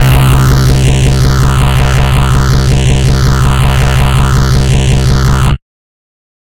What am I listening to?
SemiQ leads 14.
This sound belongs to a mini pack sounds could be used for rave or nuerofunk genres